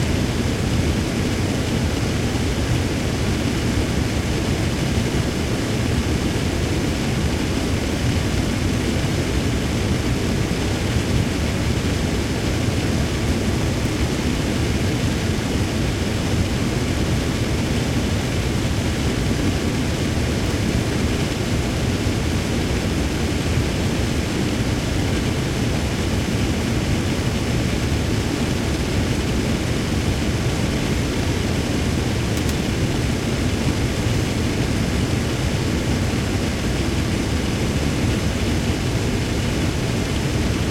auto int heat blast max
auto car int a/c heat blast max
ac, c, auto, car, heat, a, blast, max, int